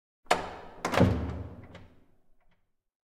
Heavy wooden door close mansion
Closing of a heavy hardwood door to the dining room at the Werribee Mansion which was built between 1874 and 1877.
heavy, closing, shut, door, close, slam